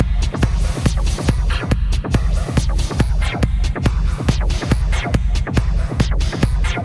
140bpm techno loop. needs some limiting on those ear piercing peaks!